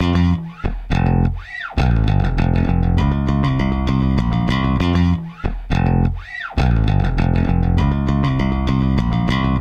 Rock_BassLine_Fm
Bass Guitar | Programming | Composition